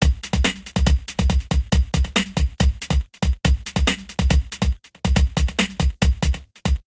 This was a loop I chopped up in Garageband. I don't have a beat slicer so I reduced the loop to its individual hits by zooming in and rearranging it. Then the loop was doubled and different effects applied to mangle it beyond recognition.